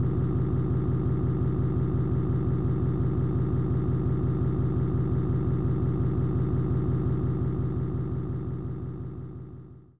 bus growl
Sound is a short, looped vocal "growl" attempting to emulate the sound of a stationary buses engine.
echo, growl, loop, voice